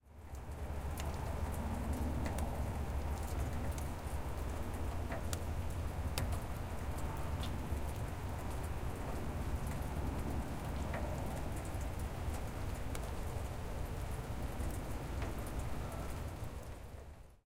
rain - garage - back door
Rain onto grass, recorded from inside garage with back door open.
ambiance, ambience, ambient, atmosphere, field-recording, garage, gloomy, gloomy-weather, grass, gray, grey, nature, outdoors, outside, rain, raining, rain-on-grass, rainy, soundscape, water, weather, wet